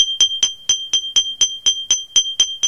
This recording is from banging a key against a glass filled with some water.
glass, toast, water, waterglass, water-in-glass